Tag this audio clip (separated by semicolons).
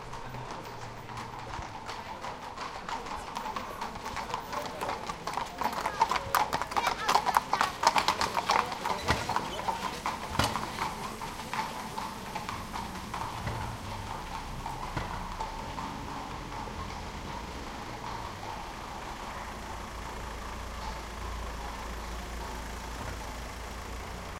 horse; people; vienna